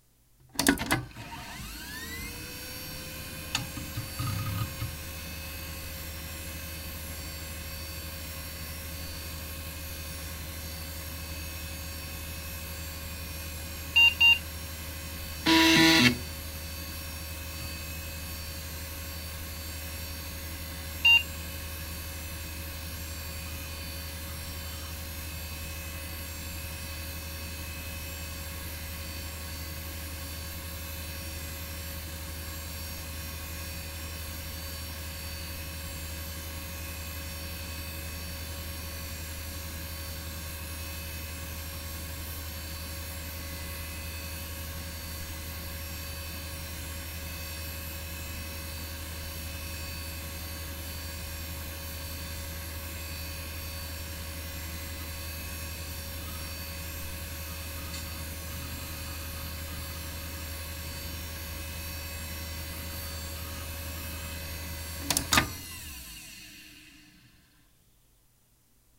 A Dell Dimension L600r being booted up and turned off. This will work nicely for those needing genuine sounds from an old computer.
The mic was placed directly inside the computer's chassis with the side door closed. No noise-removal has been applied on this recording.
Most of the sound in this recording comes from the Maxtor hard drive, as well as the 3.5 floppy drive, CPU fan, and PSU fan. Also includes a POST beep.
sound, ambiance, windows-98, computer, ambient, windows-95, old-computer, hard-drive, dell-dimension, dell